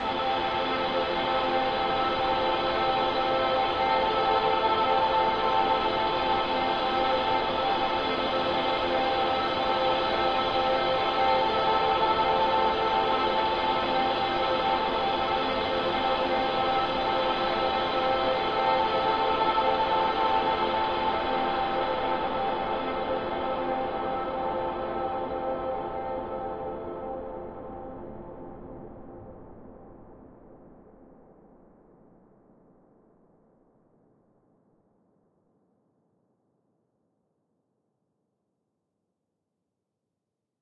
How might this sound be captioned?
Space ambience: beach distortion, light-dark. Distortion, audio sfx, dark noise. Recorded and mastered through audio software, no factory samples. Made as an experiment into sound design. Recorded in Ireland.